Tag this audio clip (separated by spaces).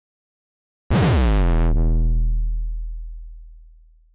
overdrive gabber hard saw-wave kick-drum percussion bass-drum hardstyle distortion f-sharp drum oneshot kick overdriven distorted bass saw hardcore kickdrum